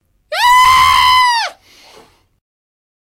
high tone scream
666moviescreams, crazy, scream, terror